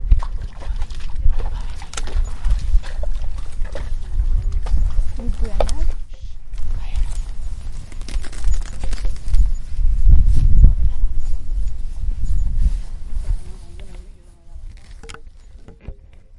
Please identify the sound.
Caballo caminando - Deltasona
nature, walking, Deltasona, hors, Birds, grass, elprat, airplanes, wind